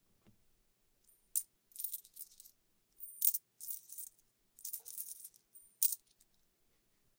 quarters jingling in hand